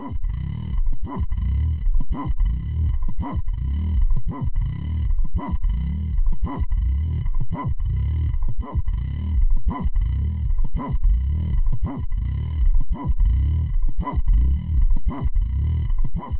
saw brain
bass, fx, reason, saw, woble